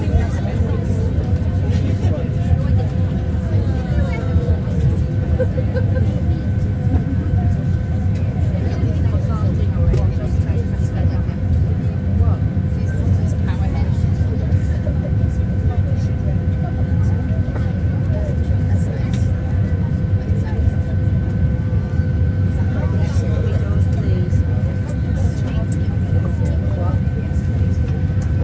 Aeroplane Cabin
Loopable recording of ambience in the cabin of an aeroplane prior to takeoff, with engine noise and voices of passengers. TUI flight from Manchester July 2018, 737 (I think). Recorded with Voice Recorder on a Samsung Galaxy S8 smartphone and edited with Adobe Audition.
airplane, cabin, people, transport